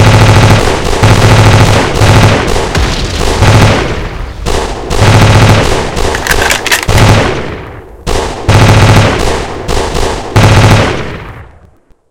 Gun Battle Short
You get a better quality of sound if you have the right speakers, but pretty epic either way.
Ammo, Auto, Automatic, Banging, Battle, Bullets, Explode, Explosion, Fast, Fire, Gun, Gunshot, Loud, Machine, Pistol, Rattle, Rifle, Scary, Scream, Shaking, Tank, Weapon, Weaponfire